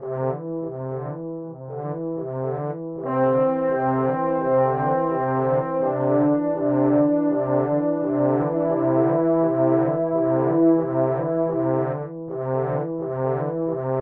horns main theme
riff, electronica, soundscape, synth